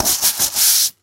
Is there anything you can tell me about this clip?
Brushing a piece of cardboard with a broom